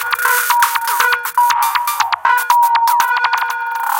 20140306 attackloop 120BPM 4 4 23rd century loop1e
This is a loop created with the Waldorf Attack VST Drum Synth. The kit used was 23rd century Kit and the loop was created using Cubase 7.5. The following plugins were used to process the signal: AnarchRhythms, StepFilter, Guitar Rig 5 and iZotome Ozone 5. The different variants gradually change to more an more deep frequencies. 8 variations are labelled form a till h. Everything is at 120 bpm and measure 4/4. Enjoy!